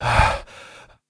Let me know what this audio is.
Breath Gasp 01
Breath recorded for multimedia project